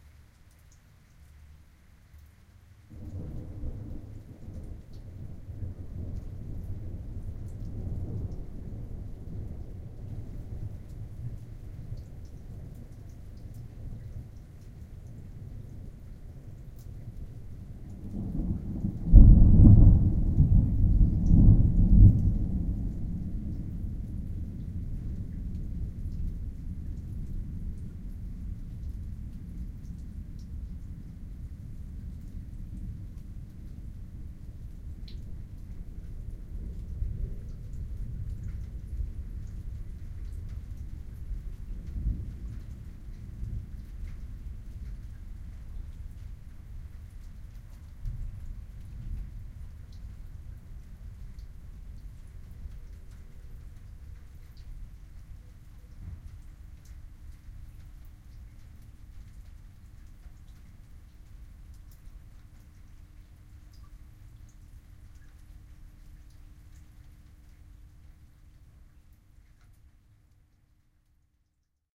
Binaural Thunder A
In this recording I am standing under my eaves making a binaural recording. This one has some nice bass response (sub-woofers on!). Starts out with a distant rumble, then a semi-muted boom, not too loud. The GEOTAG is the approximate location of the lightning.
thunder, binaural